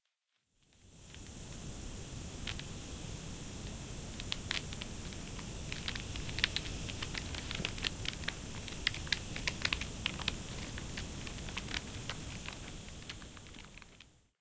A soundscape of a bonfire in a night forest